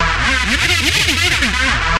Classic rave noise as made famous in human resource's track "dominator" - commonly reffered to as "hoover noises".
Sampled directly from a Roland Juno2.
juno2, synth, roland, samples, hoover